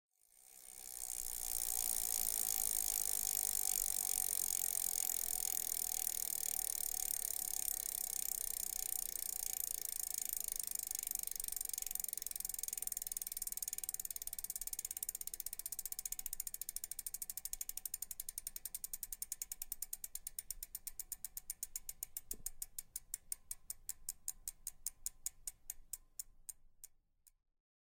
bike hub running
hub, sound, bike